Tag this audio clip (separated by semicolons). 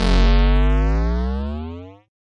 animation; cartoon; film; game; limp; movie; stretch; stretching; video